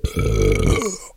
A slower burp recorded with a with a Samson USB microphone.